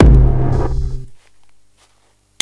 The dungeon drum set. Medieval Breaks